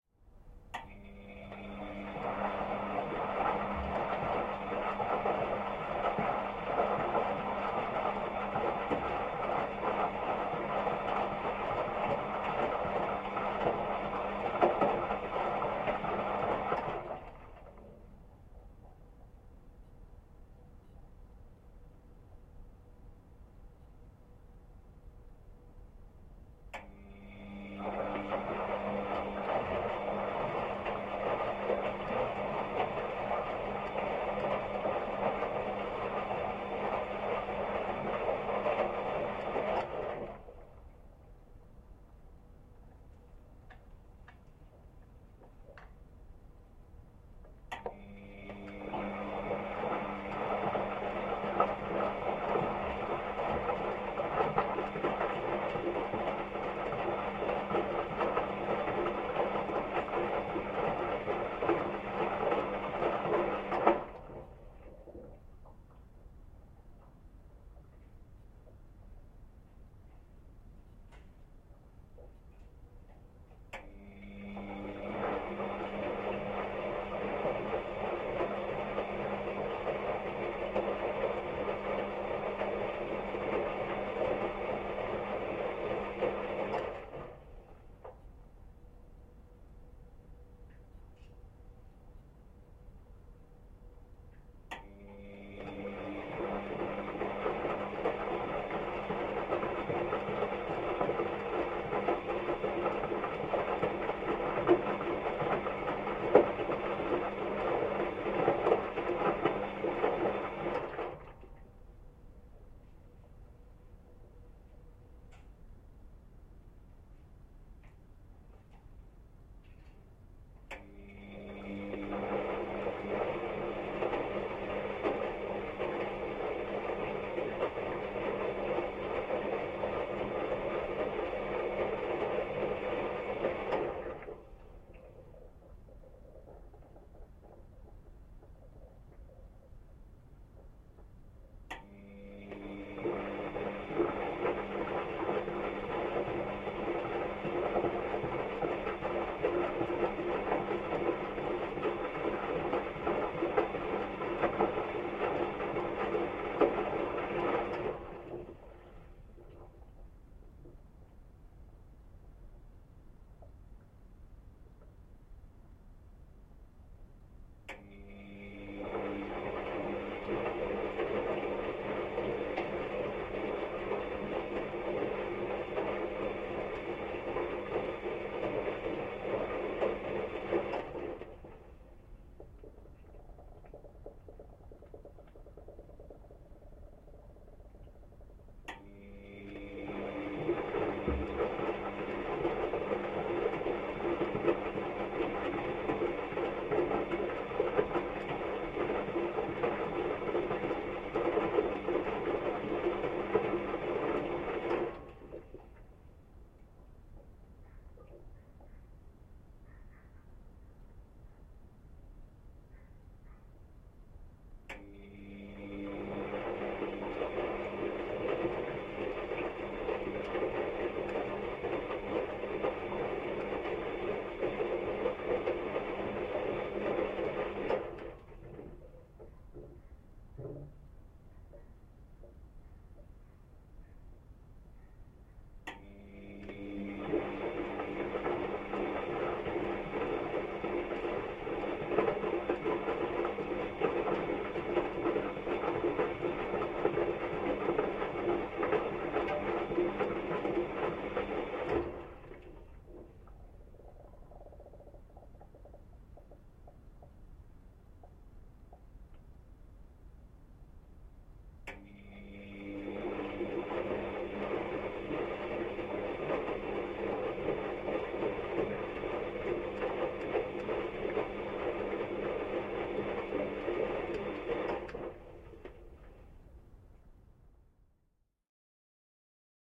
Washing Machine Washing cycle (contact mic)
Washing machine doing a washing cycle, recorded with a contact microphone.
mono, contact mic (JrF)